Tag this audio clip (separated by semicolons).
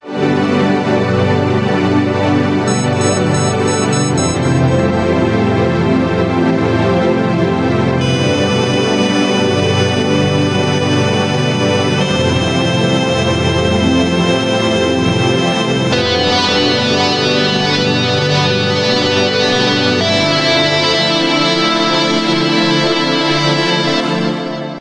ambience; beatiful; electronic; harmony; melody; music; nice; strings